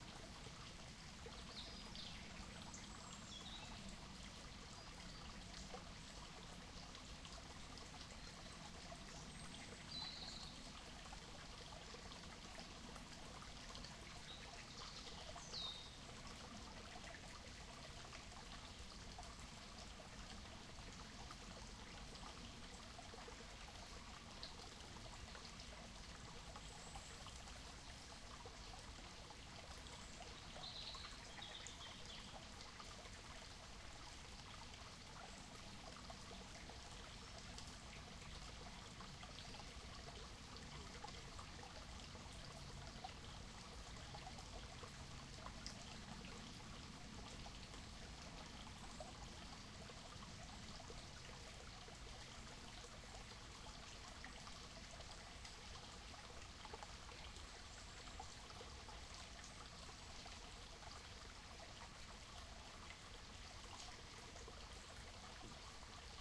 cefn on stream 2
quite close mic on a stream in woodland. There's some bird song and a bit of distant traffic too.
stream, water, woodland